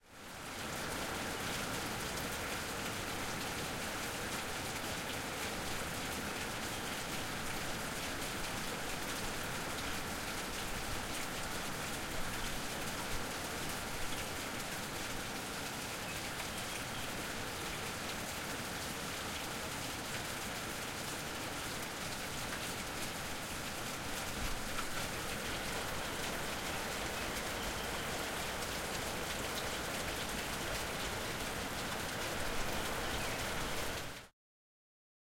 rain gutter sink roof
this is part of a series of rain and thunder sounds recorded at my house in johannesburg south africa, using a zoom h6 with a cross pair attachment, we have had crazy amounts of rain storms lately so i recorded them with intent of uploading them here. a slight amount of eq has been applied to each track.
drip, dripping, drips, drops, field-recording, gutter, rain, raining, water, weather, wet